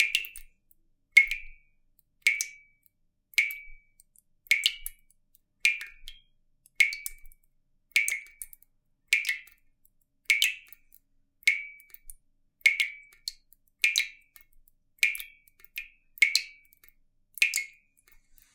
Dripping tap slowly into a large saucepan
A tap dripping slowly into a large saucepan, which adds a resonance to the sound. Some noise reduction has been applied.
sink, dripping, tap, water, drip, drips